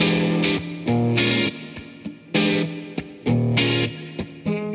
You Should Get That Checked
This is from a collection of my guitar riffs that I processed with a vinyl simulator.This was part of a loop library I composed for Acid but they were bought out by Sony-leaving the project on the shelf.